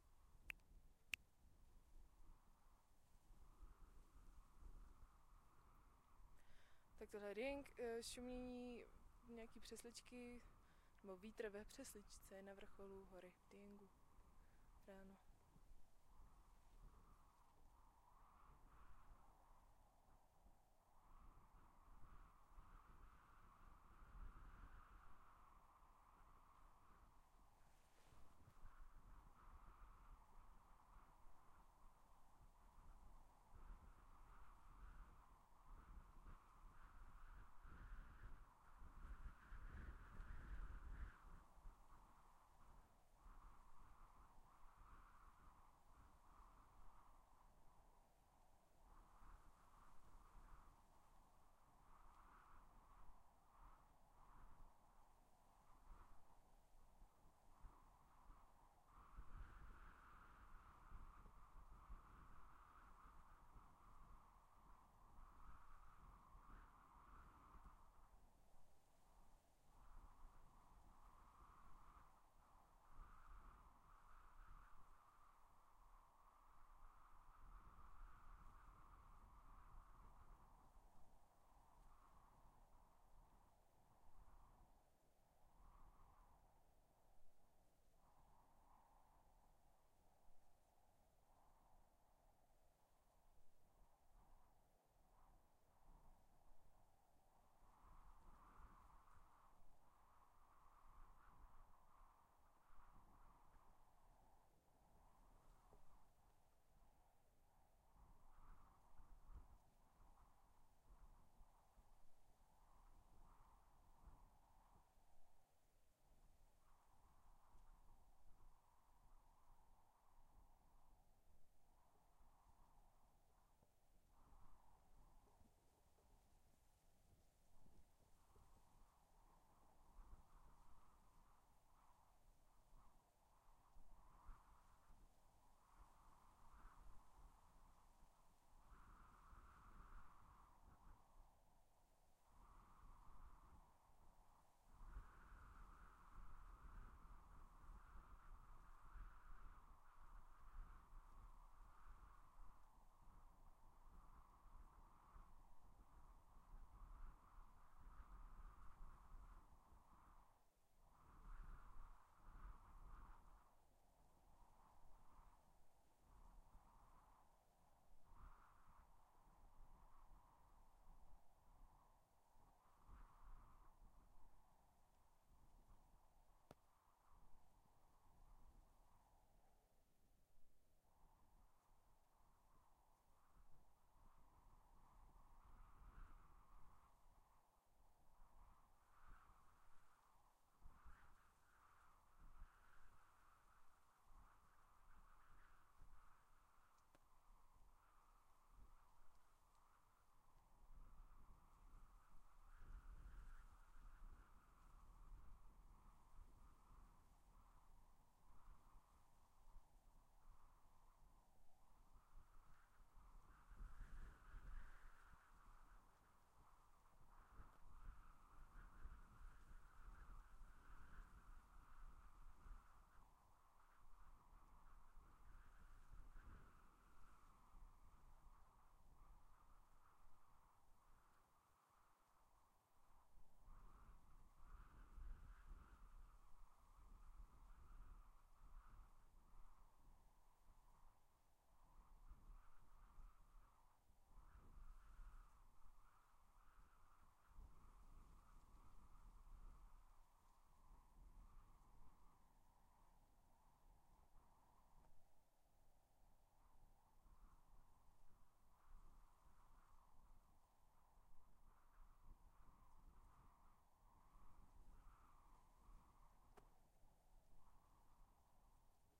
Wind in the grass
Recording that I took on a mountain in Dieng (Indonesia). There was this long, strange kind of grass in which the wind sounded amazing. At least I think.
wind-chimes, grass, windy, natural-soundscape, trees, wind